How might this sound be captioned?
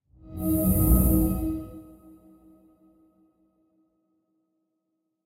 An ethereal-sounding digital woosh effect. Created using Xfer Serum, layered with various abstract samples. Perfect for transitions, motion, or HUD/UI elements.